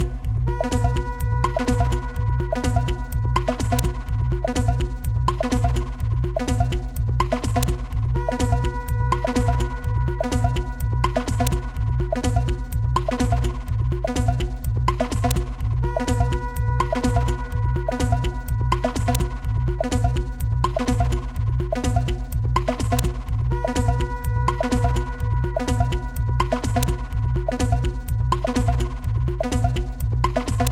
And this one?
Minimal Lift B (125 bpm)
build, lift, minimal-techno, tech-house, techno